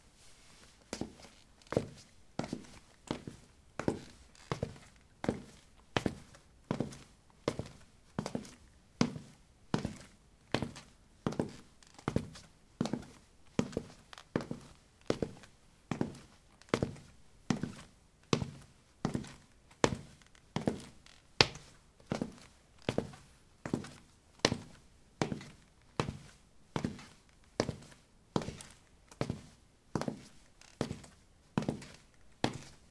ceramic 17a boots walk
Walking on ceramic tiles: boots. Recorded with a ZOOM H2 in a bathroom of a house, normalized with Audacity.
footstep, footsteps, steps